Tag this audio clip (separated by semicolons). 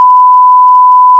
bleep; beep; censor